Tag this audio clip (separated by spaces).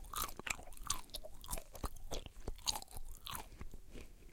smacking mouth-enzymes chewing saliva eating